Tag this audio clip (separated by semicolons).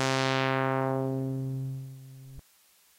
Monotron; synth; Sample; sfx; korg; sampler; sound